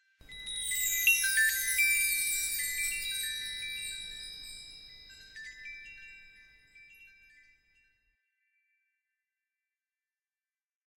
A short comp of 3 different windchime sounds, using filters, reverb, and pan modulation.